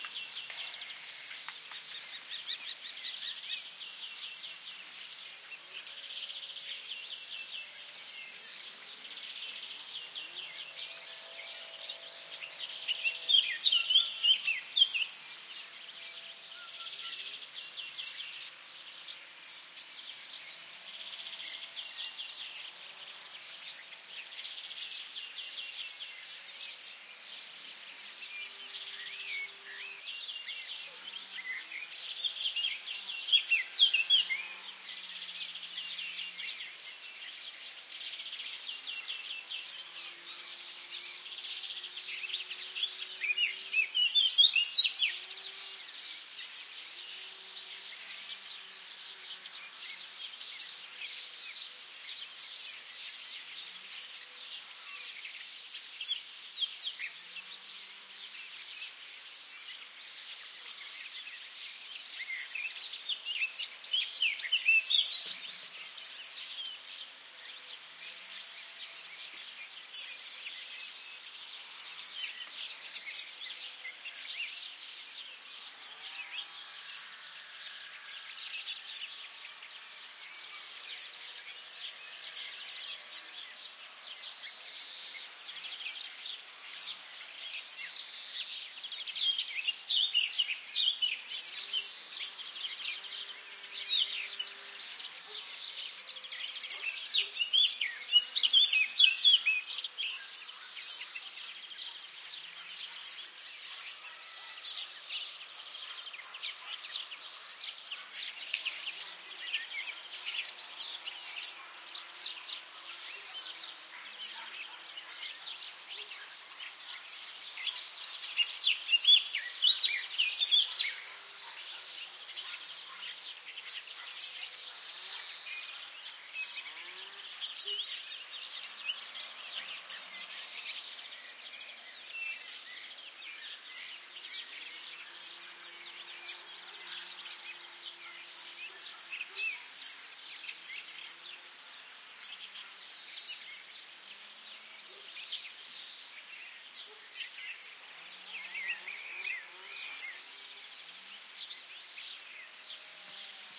Birds in olive Grove In spain
Stereo recording of ambient sounds at the edge of an olive grove in southern Spain
I EQed down some of the vehicle sounds so this is mainly focused on bugs and birds
would recommend combining with your favorite wind sound to round out the low end
recorded with a pair of sm81's on an SD 702
ambient, birds, chirping, countryside, olive-grove